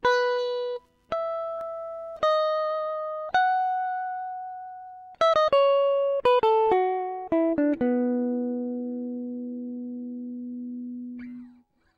guitar melody 8
Improvised samples from home session..
fusion, acid, jazz, funk, pattern, lines, guitar, apstract, groovie, licks, jazzy